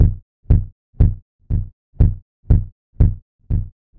Simple rhythm: 120bpm, 4/4, bassdrum on every beat.Part of the tutorials for Stereo Trance Gate.Gate vst effect used to make the bassdrum snappier and to introduce a slight variation to the rhythm.
120bpm, gate, bassdrum, drum, drum-loop, drumloop, drums, gated